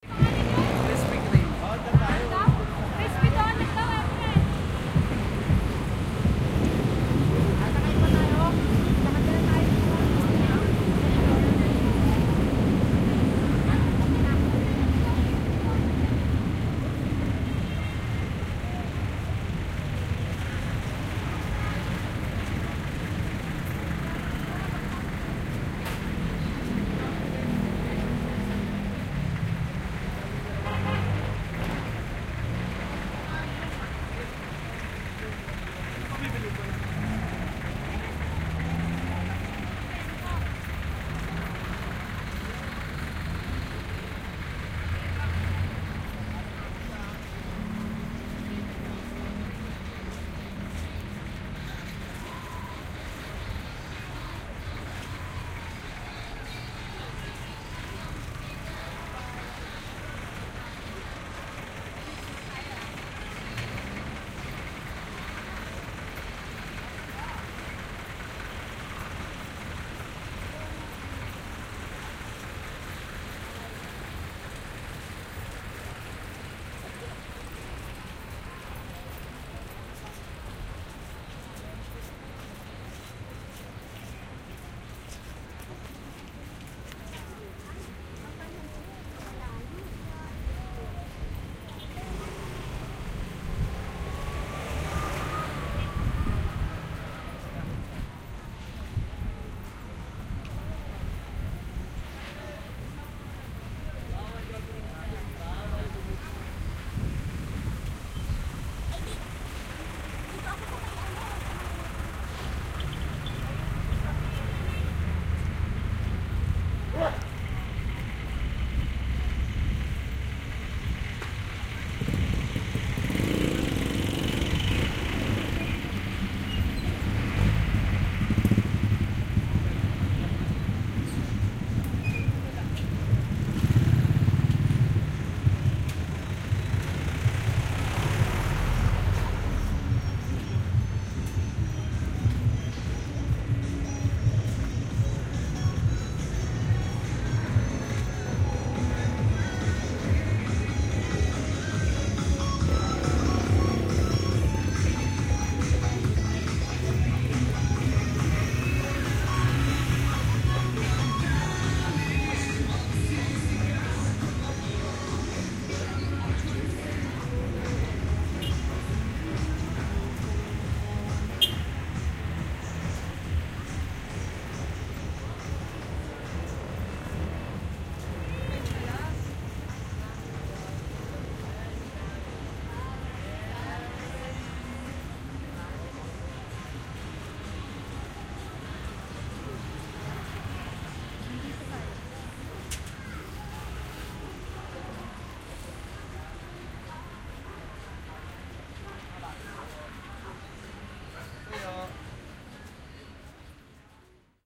LS 34206 PH ManilaWalk
Walk in Manila, Philippines. (binaural, please use headset for 3D effect)
Here, I’m walking in Manila (Philippines), with the street on my left. You can hear the sounds of the vehicles (cars, motorcycles, jeepneys...), and the voices of the people walking around. Unfortunately, it was a little windy during this afternoon, so you can hear a bit of wind in the microphones.
Recorded in January 2019 with an Olympus LS-3 and Soundman OKM I binaural microphones (version 2018).
Fade in/out and high pass filter at 80Hz -6dB/oct applied in Audacity.
atmosphere, soundscape